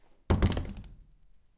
door close hit
hit, movement, door, close